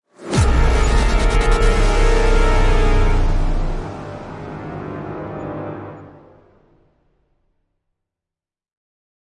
Horror Hit 3
Produced in FL Studio using various VSTs
horror, sinister, scary, soundtrack, terror, soundscape, drama, dark, suspense